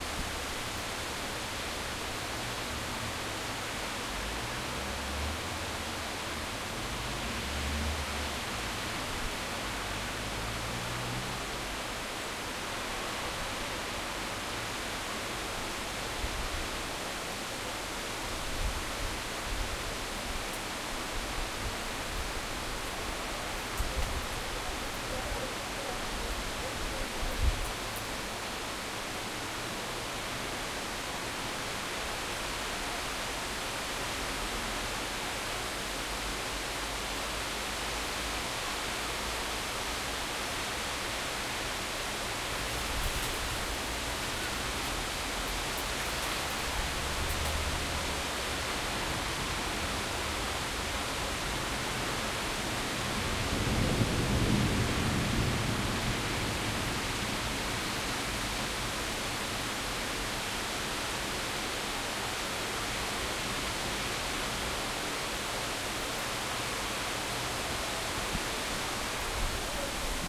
rain storm shower 1 XY
Unprocessed field recording of a storm with heavy rain in residential area. Bird-eye perspective, some faint traffic noise in the background.
storm, field-recording, rain, shower